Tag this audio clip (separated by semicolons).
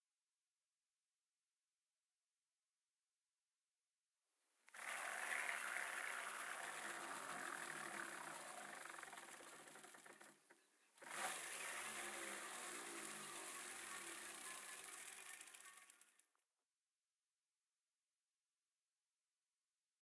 approach; bicycle; bike; chain; click; downhill; freewheel; jump; park; pedaling; ride; rider; street; terrestrial; wheel; whirr